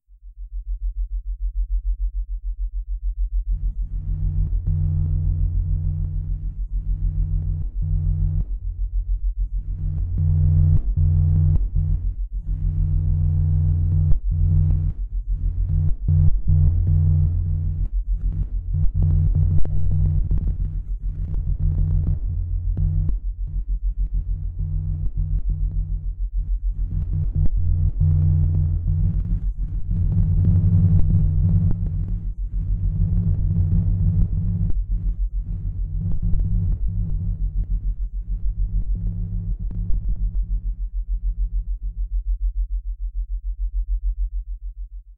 Machinery BN
Various artificially created machine or machinery sounds.
Made on Knoppix Linux with amSynth, Sine generator, Ladspa and LV2 filters. A Virtual keyboard also used for achieving different tones.
Machinery, Machine, Mechanical, SyntheticAmbience, Factory